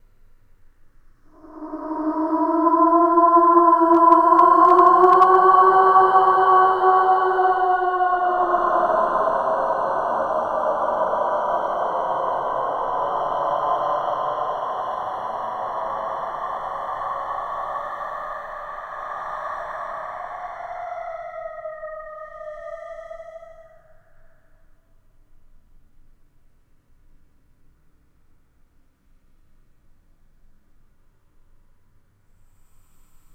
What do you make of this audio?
moaning ghost
fear, moaning, haunted, creepy, ghost, scary, horror, phantom, nightmare, spooky